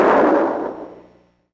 jfk taps IR
Some processed to stereo artificially. JFK assassination related audio sources.
historical, impulse, response, vintage